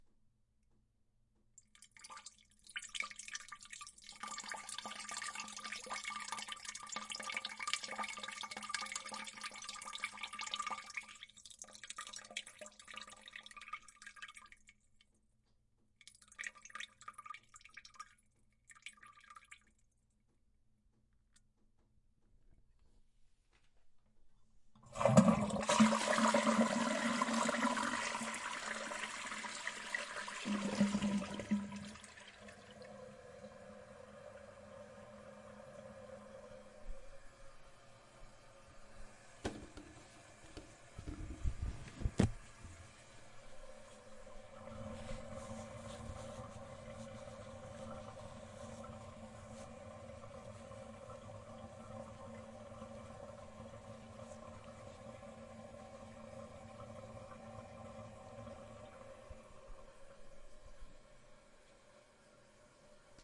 Woman peeing
The sound of a woman urinating on a half-empty bladder.
flush, pee, peeing, piss, toilet, urinate, urination